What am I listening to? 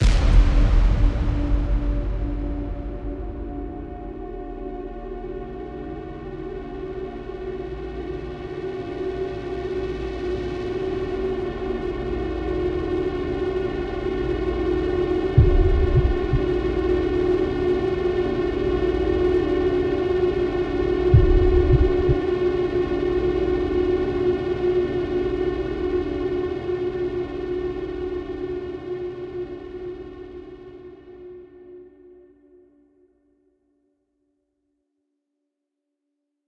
Breaking the Atmophere (The Wait)
A single hit cinematic sound